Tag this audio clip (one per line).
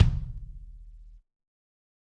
god
kick
kit
pack
realistic